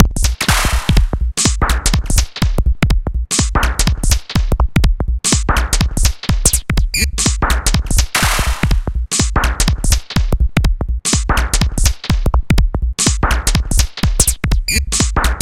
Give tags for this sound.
industrial; loops; machines; minimal; techno

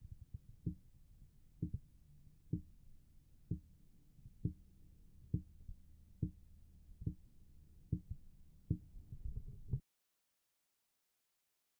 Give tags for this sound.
steps; walk; walking

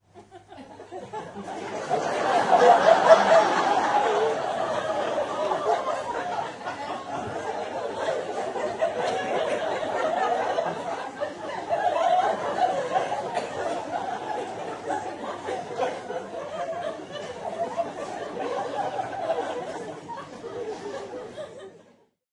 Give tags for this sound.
auditorium; audience; czech; prague; crowd; laugh; theatre

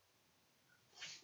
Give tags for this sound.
cloth; computer; motion; mouse; movement; moving; shrill; slide; sliding; stirred; technology

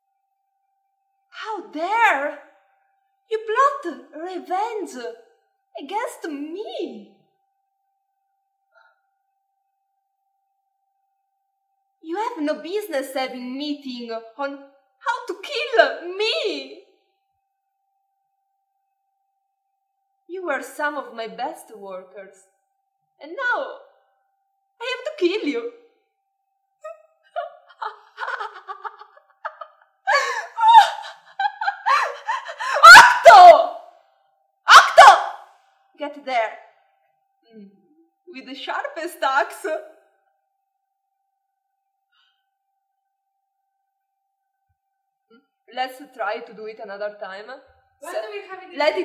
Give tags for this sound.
weird; noise; aliens

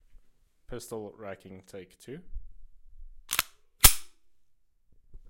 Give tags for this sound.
Magazine; Pistol; Loaded; Racking; Gun; Firearm; FX; Weapon; Chambering; OWI

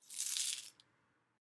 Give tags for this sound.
coins; hand

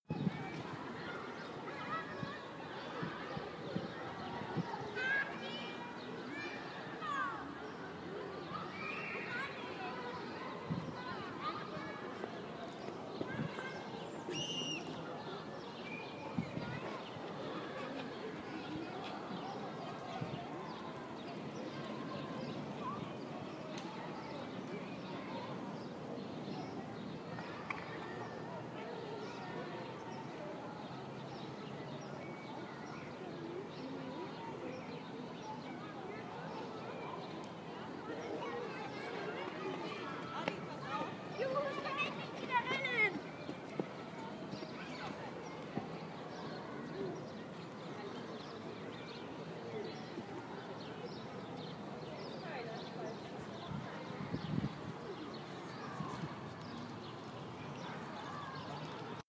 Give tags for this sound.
yelling; kindergarten; kids; screaming; playing; playground

